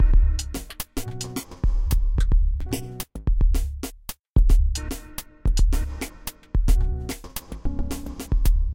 TechOddLoop5 LC 110bpm
Odd Techno Loop
loop, odd, techno